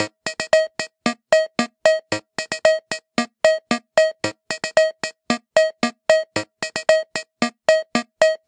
pss-130 rhythm latin
A loop of the latin rhythm from a Yamaha PSS-130 toy keyboard. Recorded at default tempo with a CAD GXL1200 condenser mic.
electronic, keyboard, loop, percussion, Portasound, PSS-130, PSS130, rhythm, toy, Yamaha